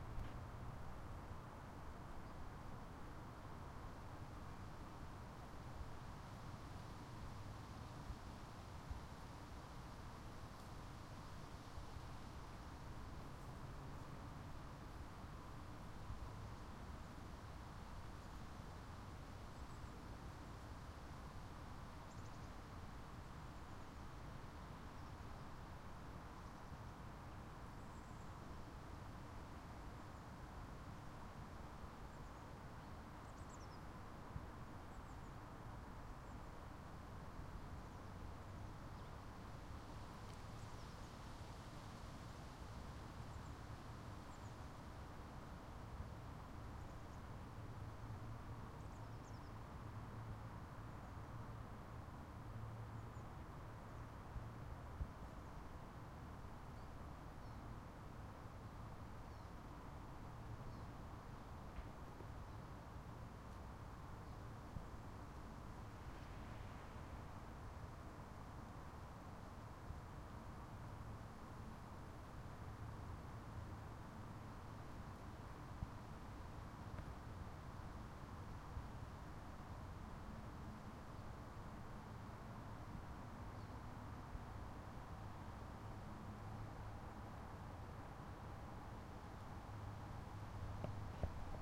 Forest Day roadhumm birds
A simple field recording of an autumn day in Tikkurila, Vantaa, Finland.
Field-recording, Cars, Day, Road, Forest, Summer, Autumn, Birds